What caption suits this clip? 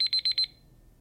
A digital kitchen timer alarm that is correctly timed so that when looped it will create the correct rhythm of the actual timer.
alarm, kitchen, timer, clock